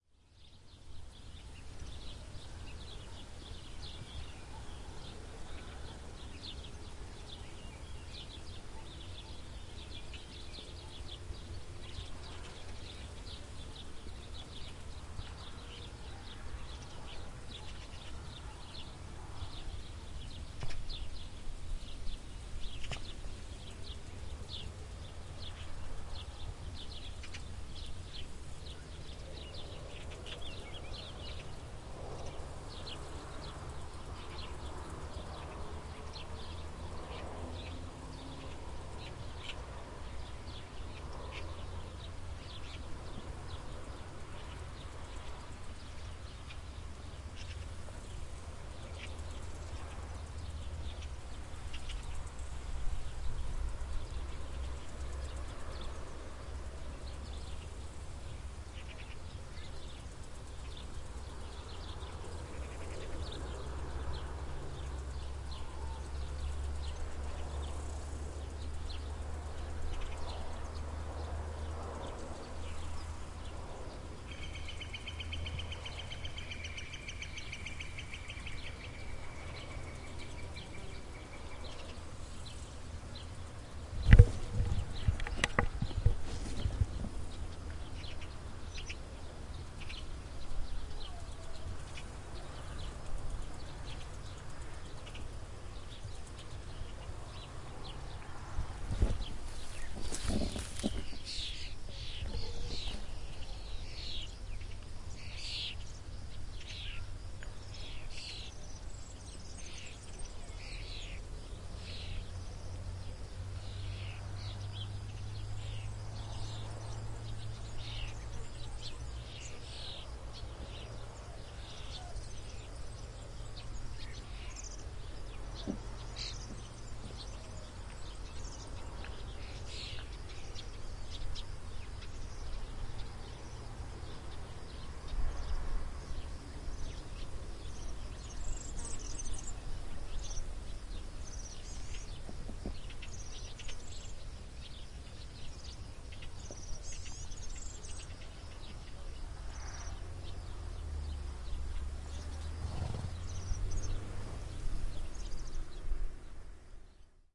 Walk in Samalús Part 1
Field-recording of a small walk in Samalús (Catalunya, see the geotag).
The recording was made with a Zoom H4n.
field-recording, samalus, ambience